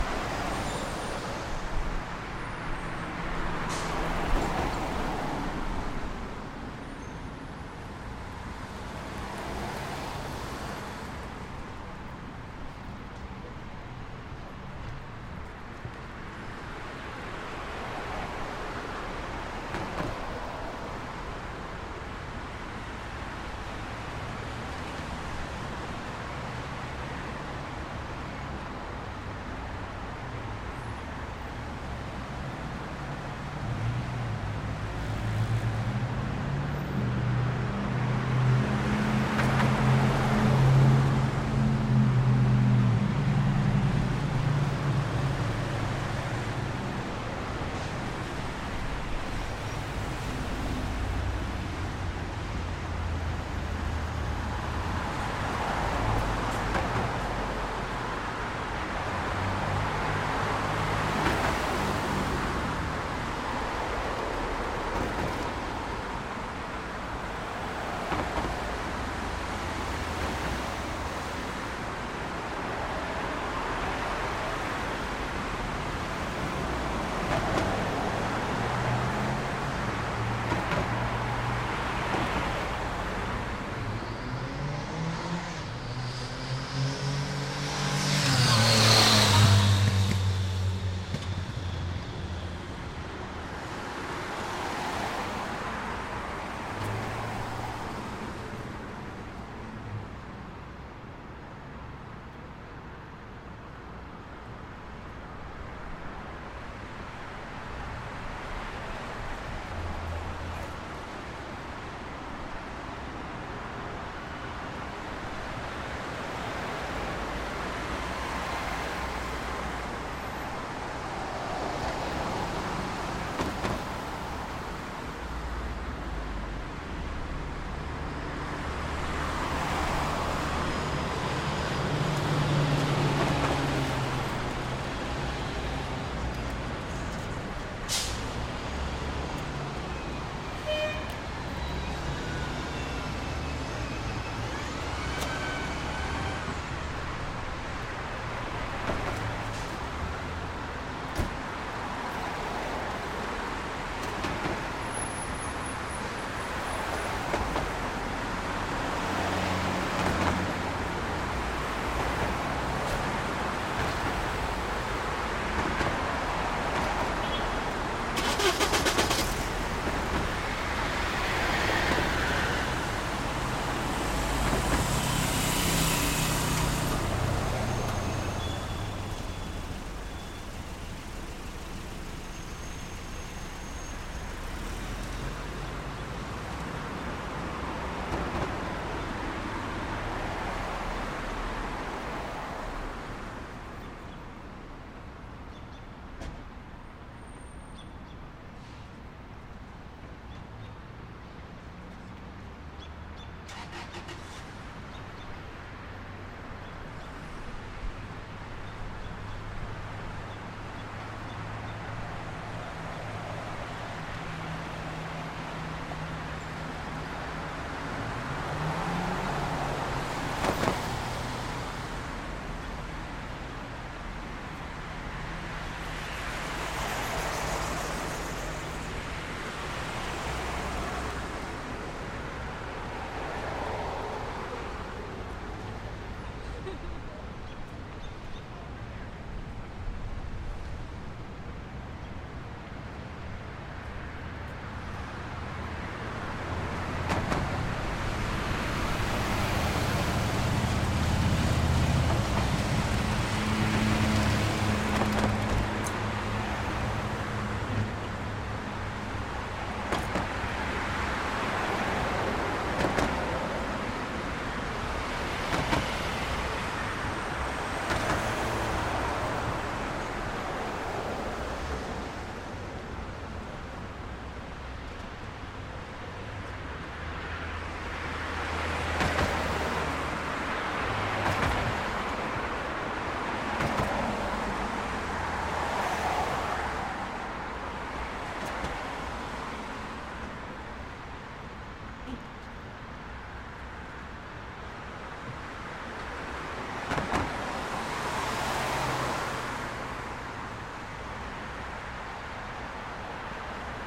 City Street Traffic 02
Afternoon rush hour traffic on a four lane city road recorded from a roof balcony.
Rode M3 > Marantz PMD661.
traffic, bus, motorcycle, urban, street, city, truck, ambience, cars